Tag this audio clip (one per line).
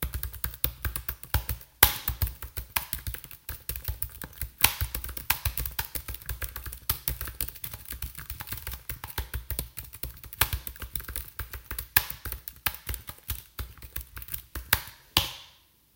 boss
business
clicking
computer
corporate
hacking
keyboard
keys
keytype
laptop
mac
office
typing
work
writing